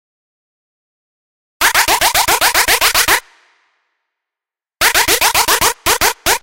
Simple Dubstep Plucks
Good for chopping and shit :3 I would love it if you gave me credit and show me how you used it, but it's not needed. Showing me that you used it lets me know that my sounds are worth downloading!
150, synth, 150-bpm, simple-pluck-synth, Dubstep, wobble